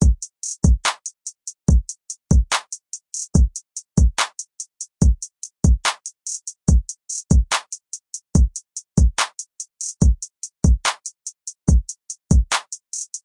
Hip-Hop Drum Loop - 144bpm
Hip-hop drum loop at 144bpm
beat, clap, drum, drum-loop, drums, hat, hi-hat, hip-hop, hip-hop-drums, hip-hop-loop, kick, loop, percussion, percussion-loop, rap, snare, trap